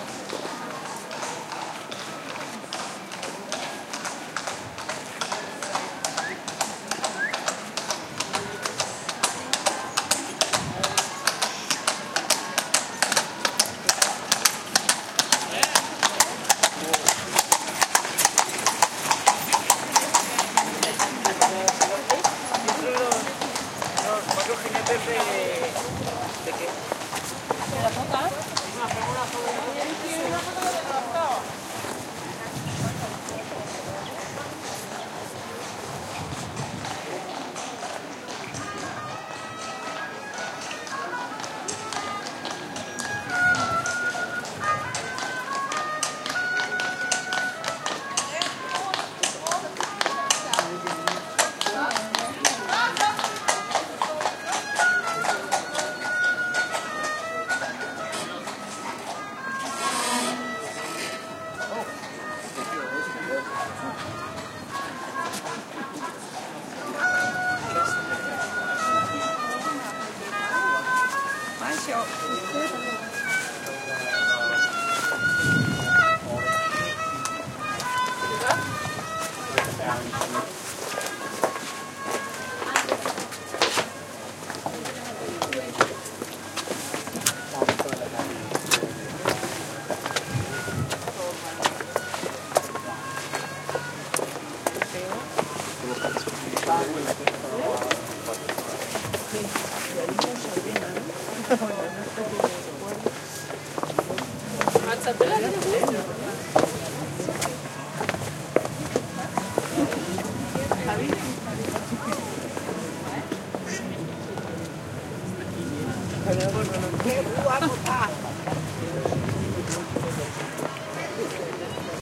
20100402.Brugge.street.03
street ambiance in Brugge (Bruges, Brujas), with horse cart passing close, voices in different languages, and street musician playing a 'funky' violin. Some wind noise. Olympus LS10 internal mics.